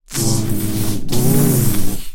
Alien Scientist 14
A strange and insect-like alien voice sound to be used in futuristic and sci-fi games. Useful for a robotic alien scientist, who are making you run all kinds of errands across the galaxy - only to build some strange kind of digestion device, or maybe a translator.
futuristic, games, videogames, gaming, Alien, Scientist, gamedev, sfx, indiegamedev, Vocal, Voice, Voices, gamedeveloping, Speak, Talk, videogame, game, indiedev, arcade, Sci-fi